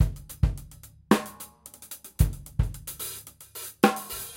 Acoustic drumloop recorded at 110bpm with the h4n handy recorder as overhead and a homemade kick mic.